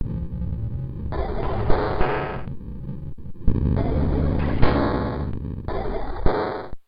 A strange strangling HIT LOOP!